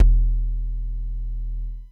CS-15bd1
The Yamaha CS-15 is analog monosynth with 2 VCO, 2 ENV, 2 multimode filters, 2 VCA, 1 LFO.